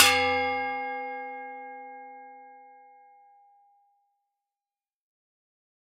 Tube hit 08, high+ringing
A real industrial sound created by hitting of a metal tube with different elements (files, rods, pieces of wood) resulting in more frequency range of these hits.
Recorded with Tascam DR 22WL and tripod.